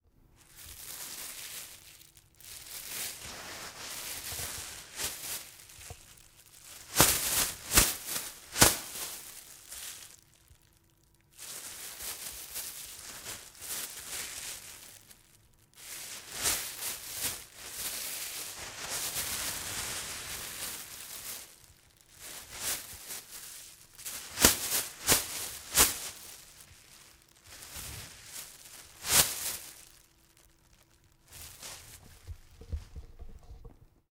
Me waving around a plastic bag.
bag, plastic, plastic-bag